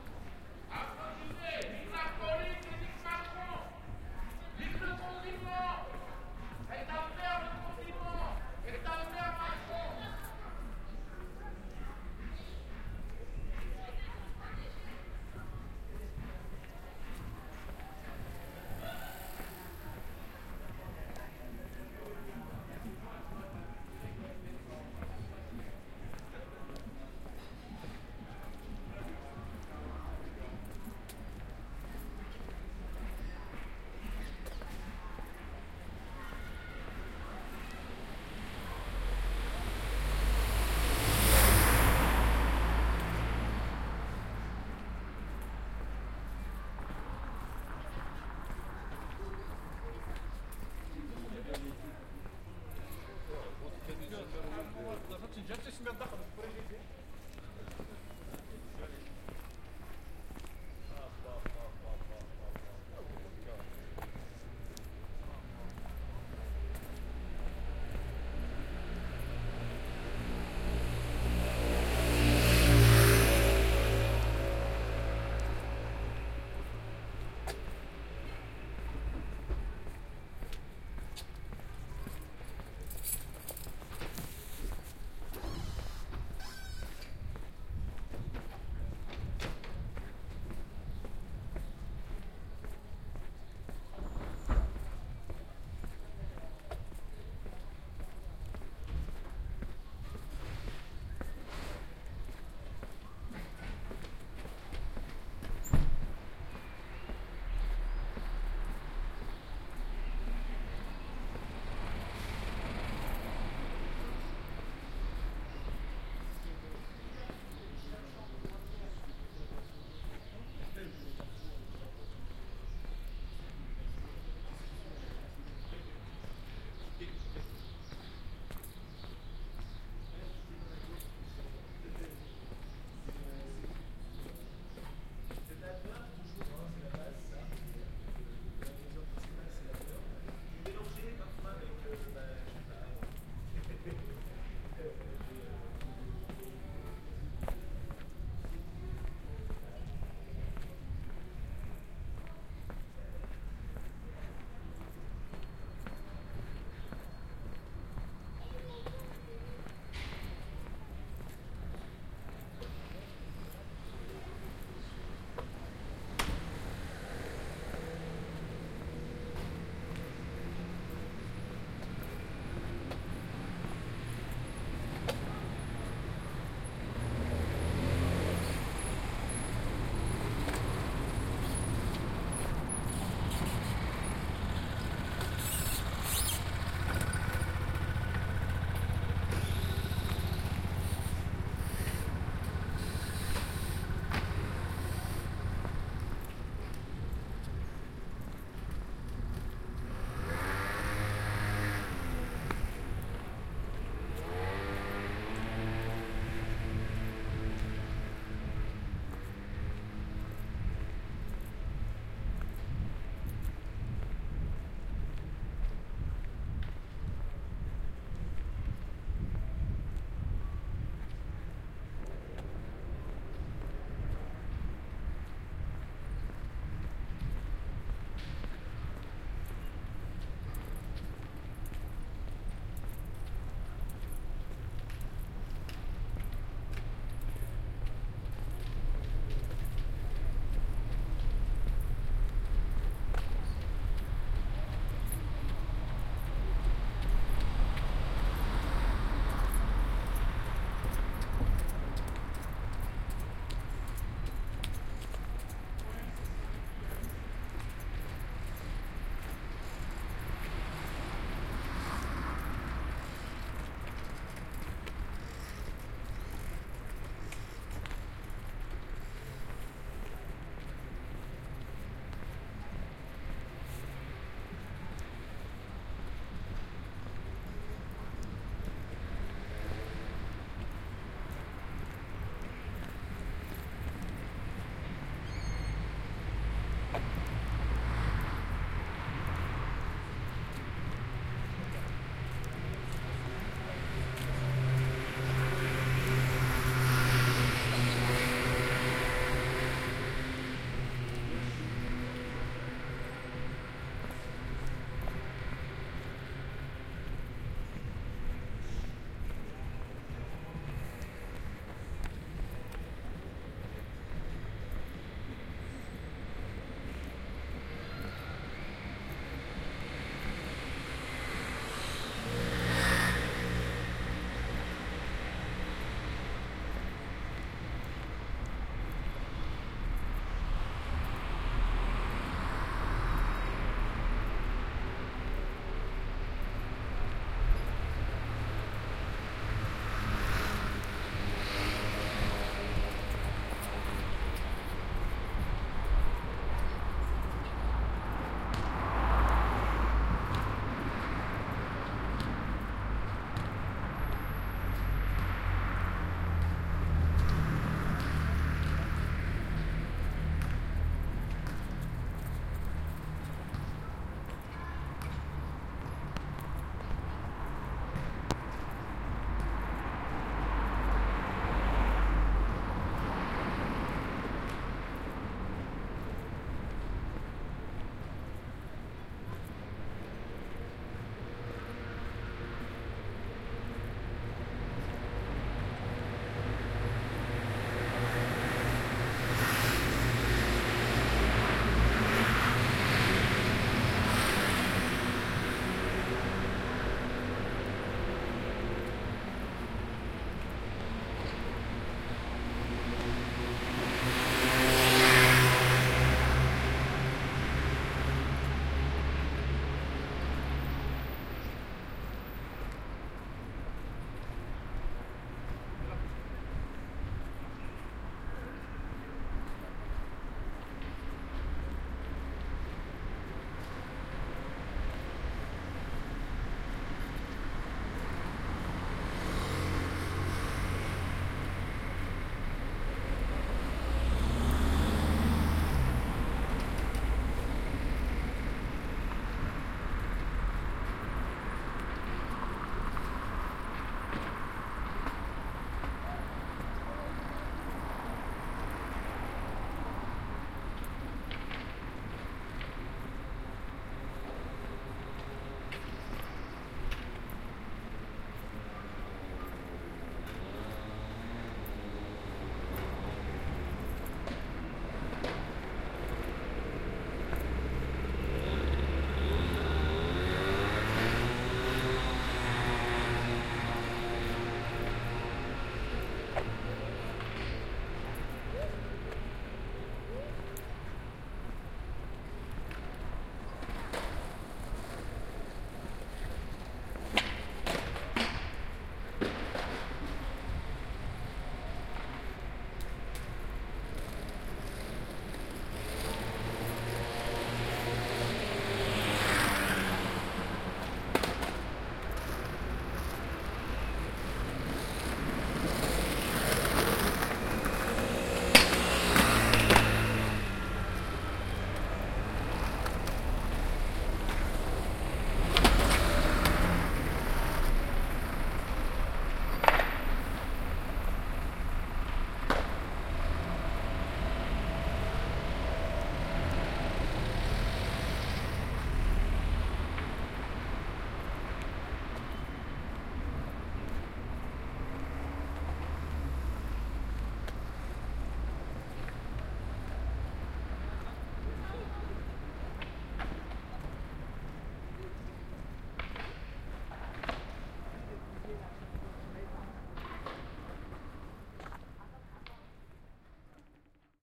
Content warning
Place de la Reunion,
normally a very lively place....
has been very quite...with some guy yelling out of the window.
My recordings is just after the daily applause for the people who help in these hard days of covid 19
n.b. this is a BINAURAL recording with my OKM soundman microphones placed inside my ears, so for headphone use only (for best results)